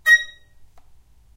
violin spiccato A#5
spiccato, violin